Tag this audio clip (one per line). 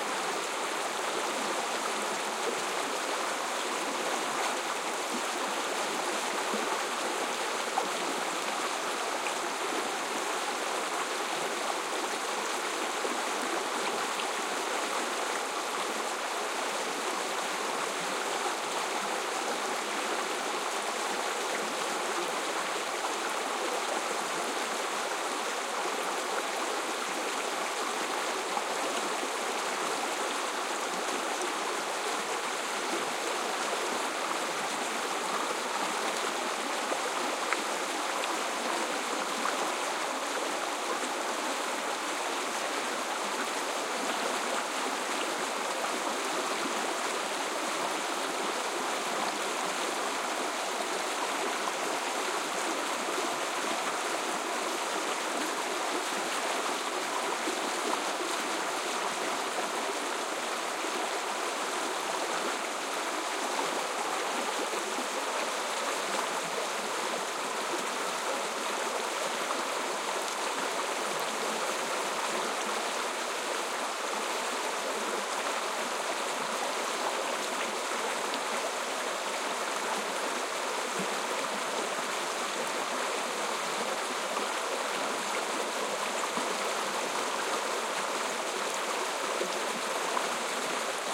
field-recording
nature
river
south-spain
stream
water